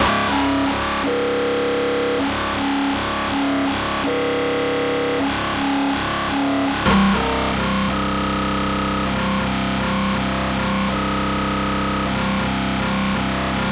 A reece created from the NI Massive modern talk and scrapyard osc with a bit of lfo
Dubstep, Bass, reece